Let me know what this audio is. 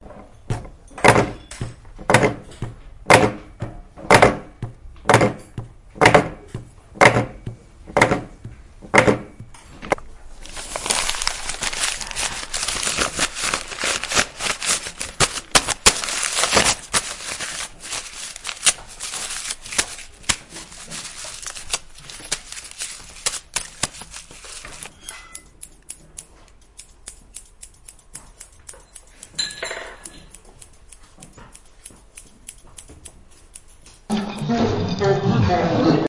French students from
Léon Grimault school, Rennes used MySounds from Germans students at the Berlin Metropolitan school to create this composition intituled "Funny Sounds".